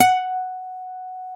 Looped, nylon string guitar note
acoustic, guitar, nylon-guitar, single-notes